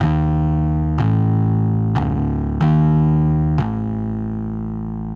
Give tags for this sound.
bass bitcrush distorted free grit guitars live

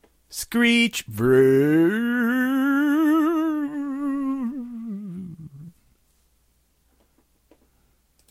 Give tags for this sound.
cartoony vocal

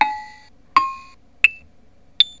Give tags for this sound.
radioshack realistic

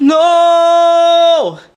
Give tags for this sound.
male man human voice vocal vocalizations

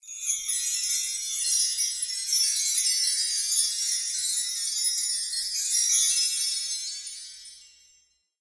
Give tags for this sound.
orchestral percussion wind-chimes windchimes glissando chimes

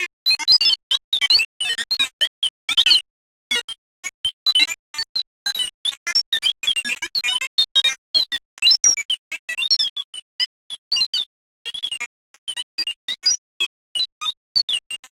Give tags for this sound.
command-post anastaska calibration prototype computer electronics cyborg base

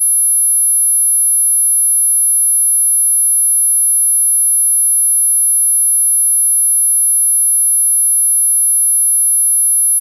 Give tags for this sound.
hearing-test sine-wave tone

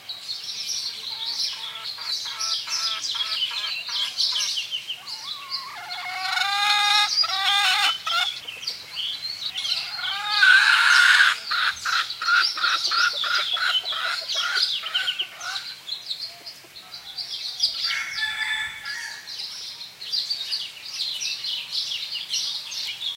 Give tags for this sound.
bird birds chickens field-recording Hawaii Kauai nature Poipu rooster

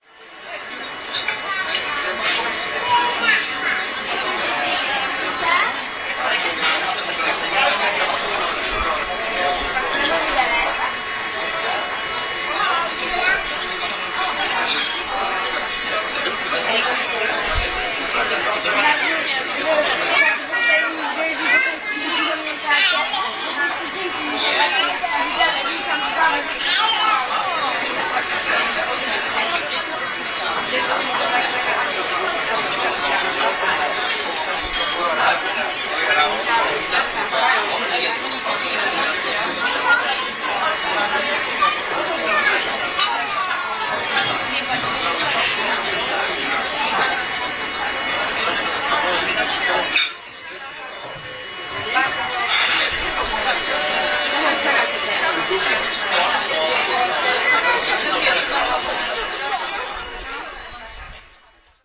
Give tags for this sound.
courtyard crowd eating people pizzeria poznan restaurant sorella teagarden voices

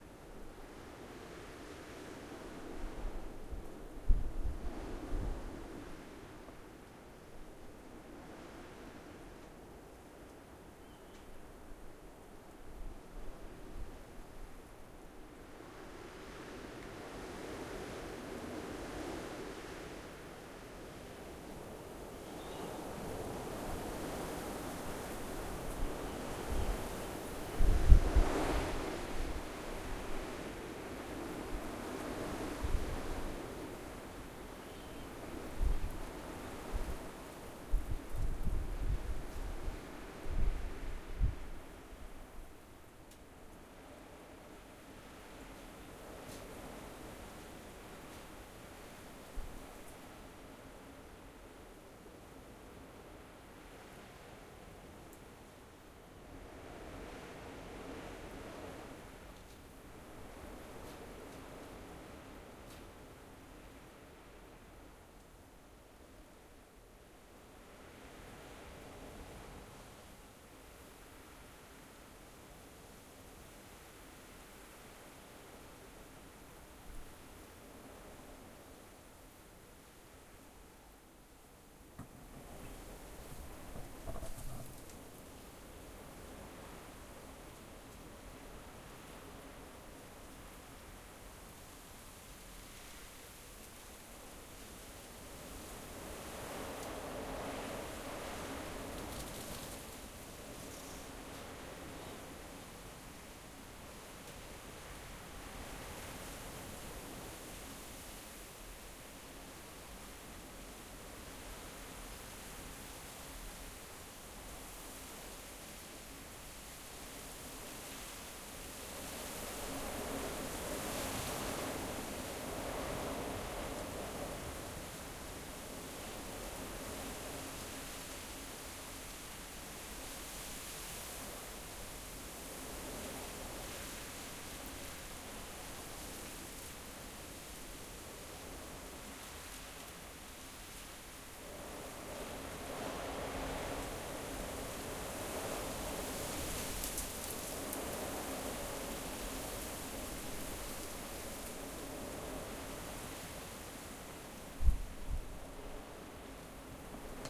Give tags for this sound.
gusts wind hale weather storm

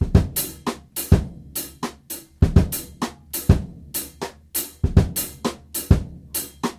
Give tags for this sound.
rimclick hip-hop soul hop rnb rimshot groovy drum hip loop drums rim rhythm groove funk hiphop funky

